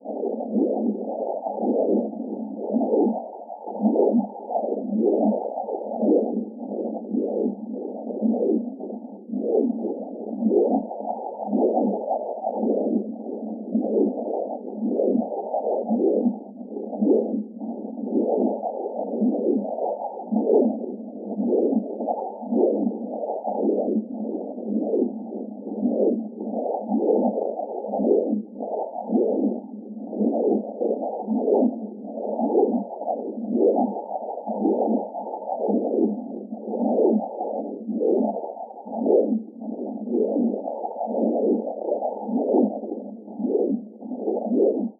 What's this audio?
A heart sonogram…but the patient doesn't seem to be human. Sample generated via computer synthesis.
Beat, Weird, Synthetic, Sonogram, MRI, Alien, Strange, Sci-Fi, Heart, Inhuman